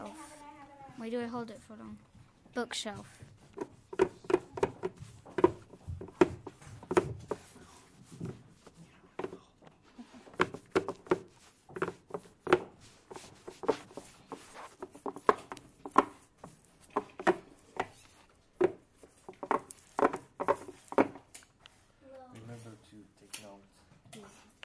sonicsnaps GemsEtoy eloisebookshelf
Etoy, TCR, sonicsnaps